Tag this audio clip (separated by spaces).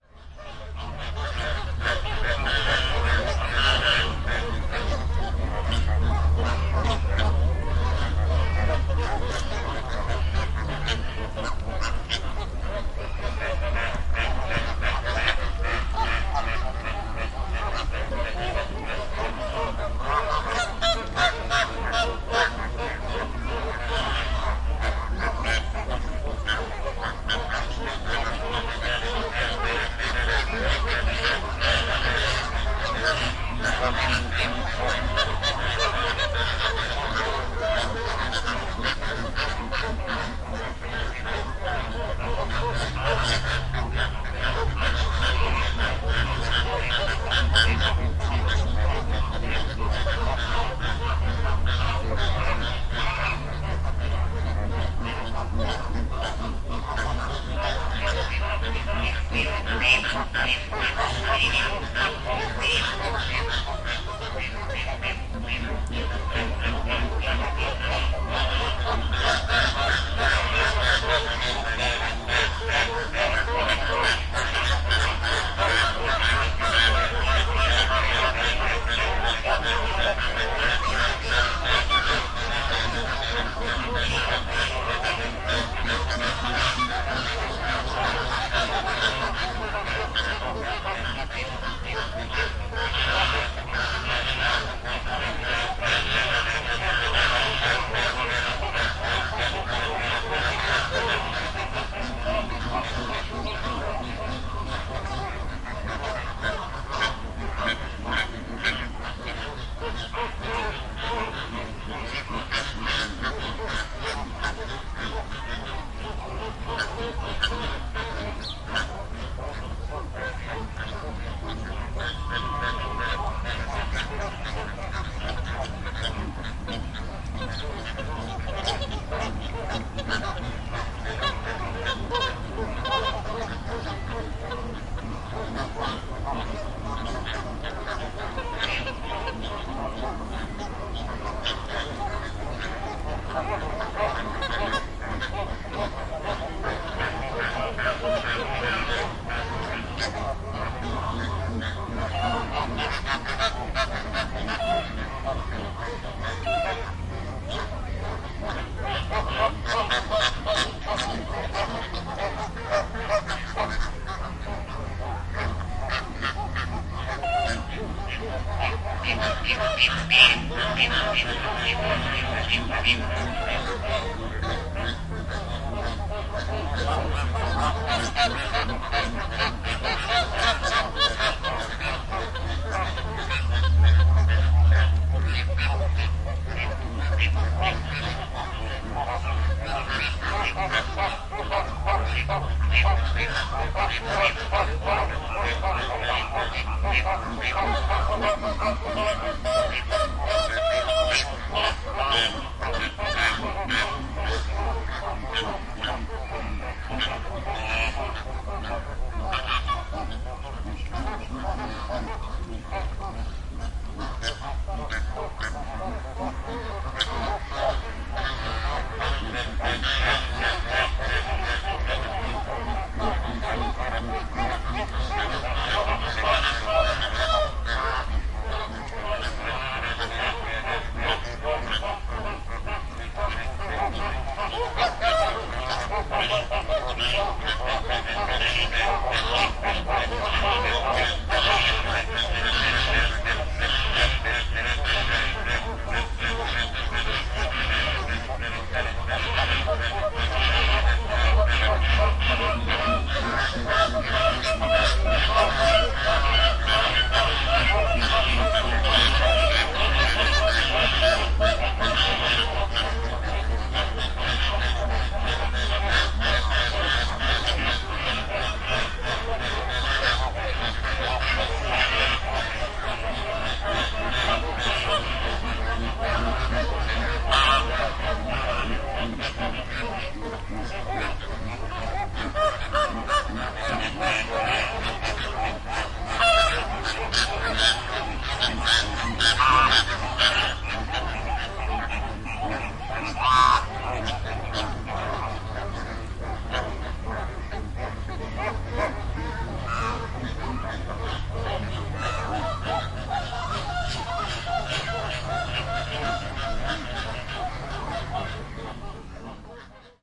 zoo flamingo pet